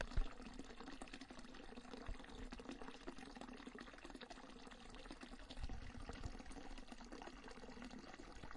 running water 2
another tiny water fall from the Sequoya National forest. This sound was recorded in the Sequoia National Forest on the Ten Mile Creek in Hume, CA on September 14, 2014 using a hand held digital recorder (I do not remember which model because it was borrowed), and has not been edited by any software.
brook, flowing, water